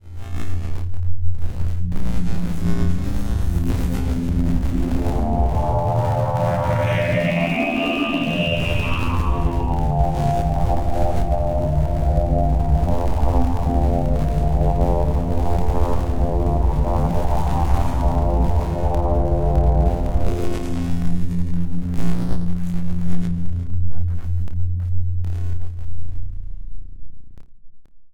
Bakteria Menace 3
Space bakteria has finally arrived to menace and threaten your neighborhood, relatives, and pets.
sounddesign, digital, static, sci-fi, electronic, noise, electric, distorted, drone, future, processed, abstract, dark, sfx, glitchy, sound-effect, panning, pulsing, sound-design